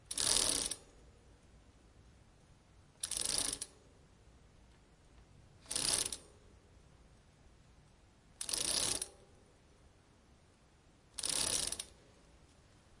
bike chain back hits
Kicking a bicycle pedal
pedal, bicycle, chain, bike